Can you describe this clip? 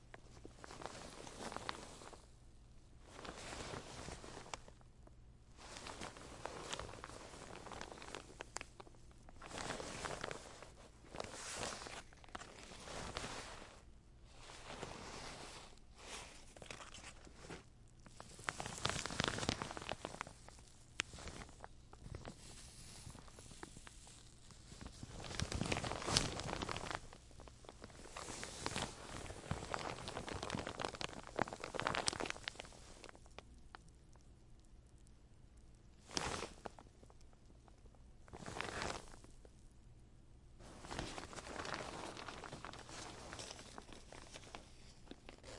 Moving around in a protective hazmat tyvek kind of suit. Recorded with a Zoom H2.